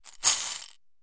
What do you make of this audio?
marbles - 15cm ceramic bowl - shaking bowl half full - ~13mm marbles 04
Shaking a 15cm diameter ceramic bowl half full of approximately 13mm diameter glass marbles.
shake, shaking, marble, ceramic-bowl, shaken, glass, bowl, glass-marbles, ceramic, marbles